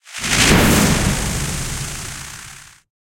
Electro Hit 04
I made this sound with Sound Forge Audition Studio and Reaper. This is one of four sounds which you can use as Lightning Spell or anything with electricity in your game.
reaper, mage, impact, magic, hit, spell, games, electric